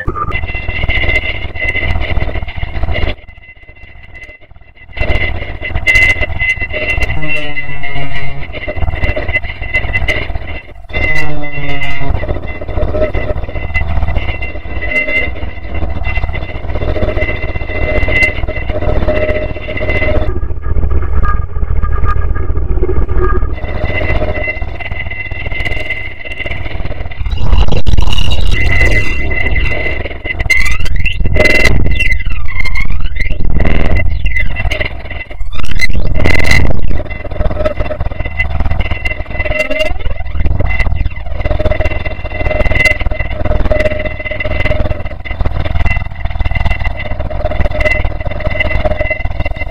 Impossible to decipher.
alien, broadcasting, intergalactic, interstellar, message, SPACE